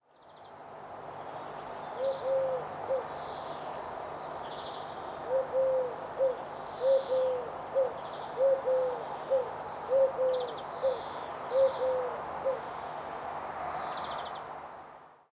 At 7:30 in the morning, a eagle-owl was heard.
bird, eagle-owl, graz, morning